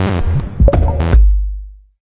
this is a strange fx sample for use in idm or minimal music.